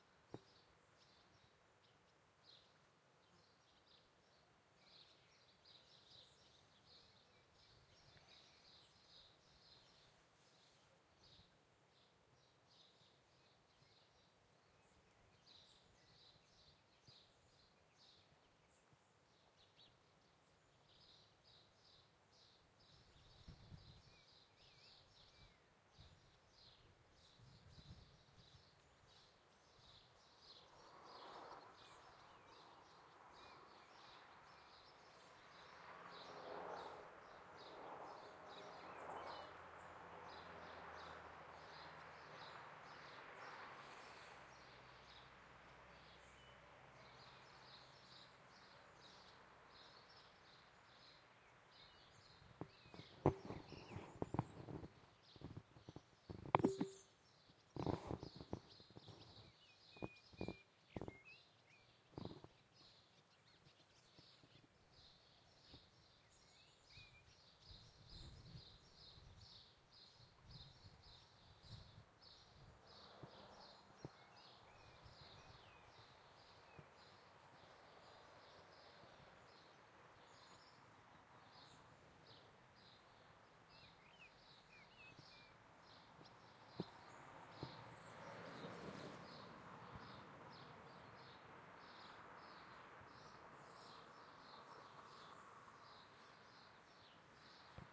ambient chicharras crickets fire-crackers pines field-recording ambience ambient mediterranean summer nature ambiance birds wind windy trees field-recording countryside mediterranean road
Sound hunter from Valencia, Spain